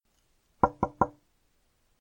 violin-neck
Tapping my sister's violin neck. I recorded this effect for a small game I made:
I used an Olympus VN-541PC and edited on Audacity.